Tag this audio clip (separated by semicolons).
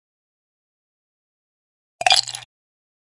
CZ; Czech